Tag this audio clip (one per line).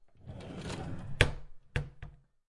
drawer
closing
close
slide
closed
kitchen
sliding